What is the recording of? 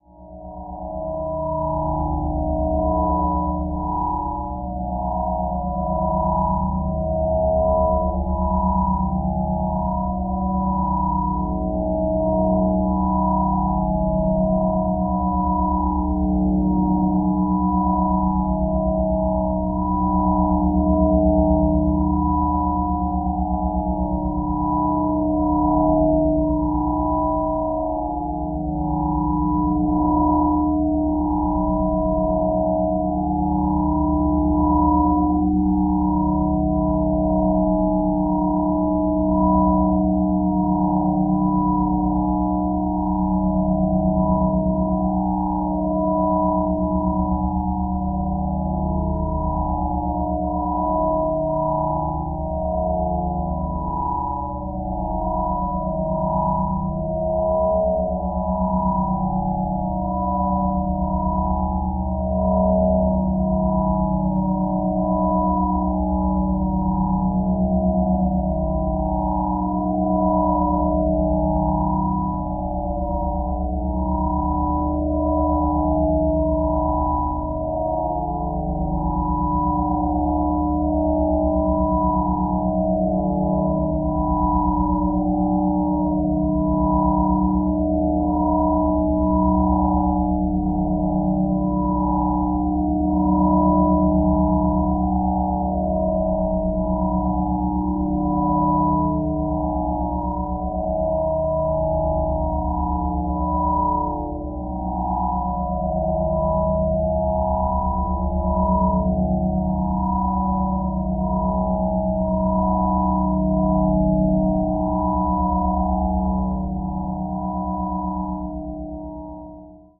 This sample is part of the "SineDrones" sample pack. 2 minutes of pure ambient sine wave. Quite dissonant harmony sweeps.